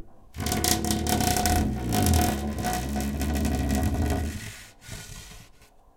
Wood Scraping Close 2
Scraping a plank. Recorded in Stereo (XY) with Rode NT4 in Zoom H4.